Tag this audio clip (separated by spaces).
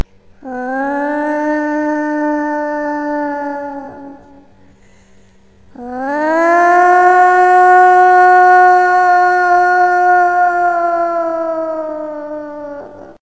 erie horror woman pitch low moaning